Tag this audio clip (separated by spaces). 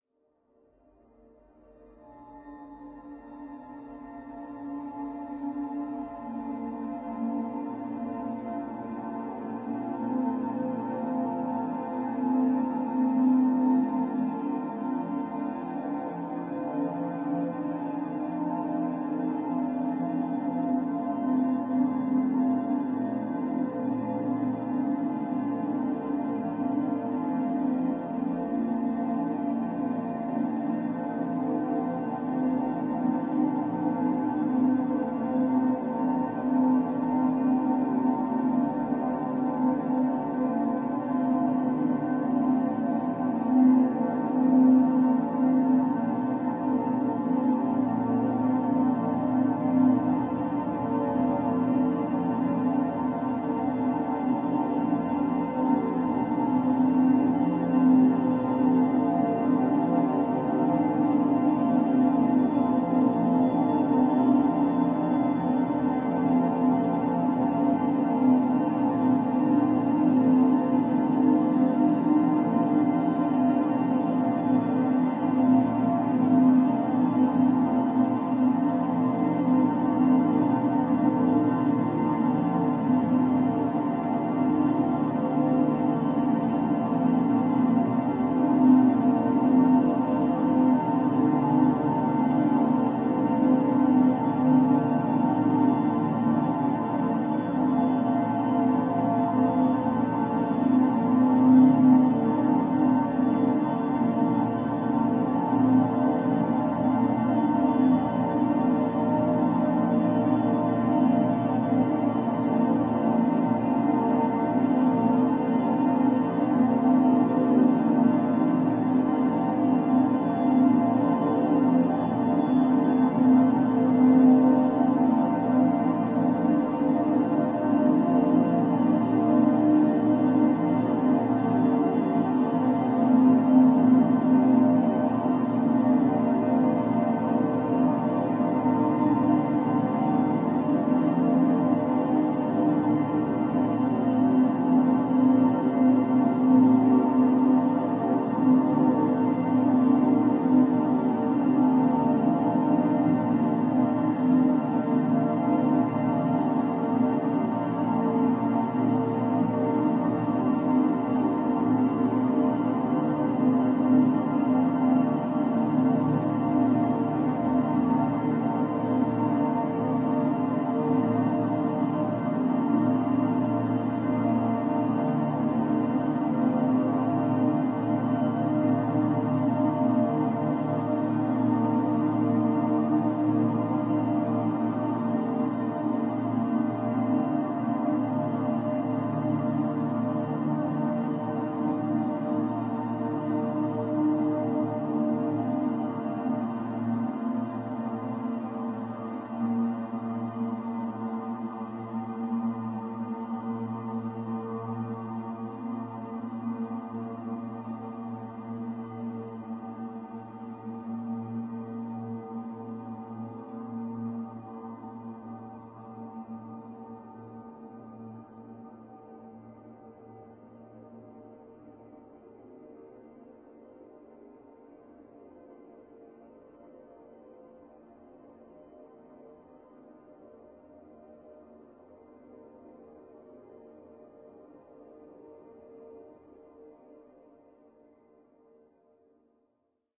pad evolving drone experimental multisample soundscape